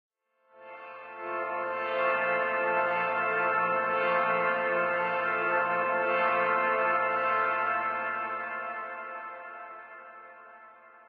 Whisper Too Quiet
A luscious pad/atmosphere perfect for use in soundtrack/scoring, chillwave, liquid funk, dnb, house/progressive, breakbeats, trance, rnb, indie, synthpop, electro, ambient, IDM, downtempo etc.
130-bpm, ambience, dreamy, effects, evolving, expansive, liquid, long, luscious, melodic, morphing, pad, soundscape, wide